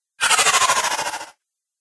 Robtic Spinning Motion
A Sci-Fi sound effect. Perfect for app games and film design. Sony PCM-M10 recorder, Sonar X1 software.
sf, motion, fx, scifi, spinning, effect, sound, robot, tech, sfx, future, android, free, futuristic, sounddesign, robotic, sound-design, cyborg, move, noise, science-fiction, spin